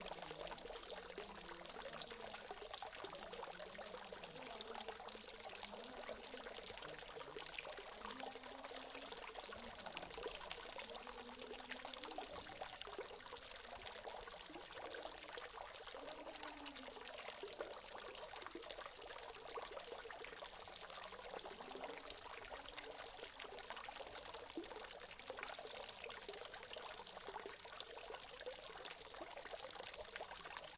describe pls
Water splattering sound on a arabic-like fountain. Rode NTG-2 into Sony PCM-M10 recorder.
fountain, water, water-splattering